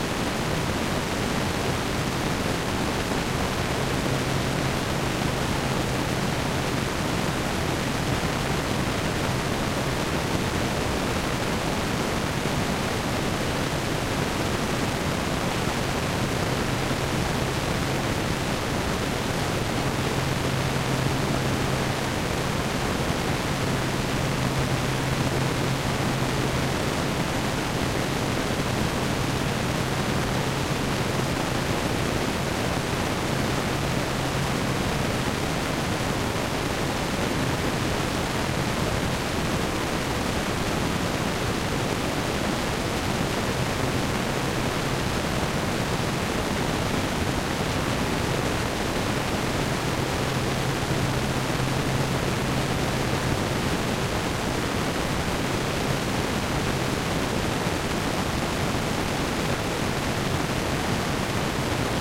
Rain Noise 1 Stereo
Stereo rain noise sample recorded with analog synthesizer.
rain, ambience, noise, effect, analogue, synth, electronic, sound-effect